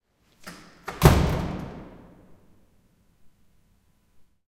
Door slam. A very heavy door falling shut at HTW Berlin.
Recorded with a Zoom H2. Edited with Audacity.